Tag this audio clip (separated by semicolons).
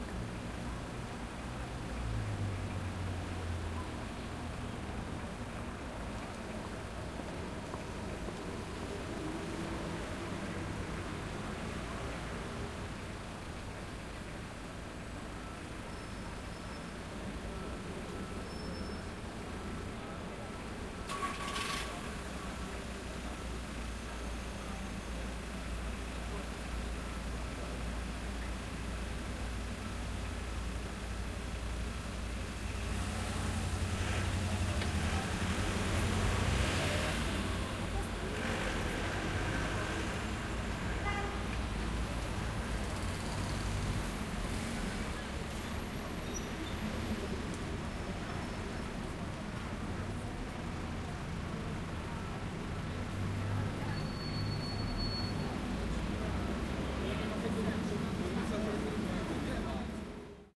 Voices; Skyline; Perspective; Close; ity; Traffic; Distant